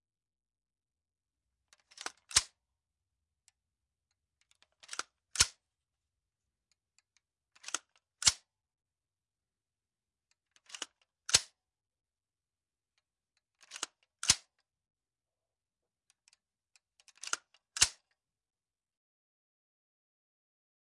M16 bolt action3
M16 bolt action foley. Recorded for a feature film in London, circa 2002.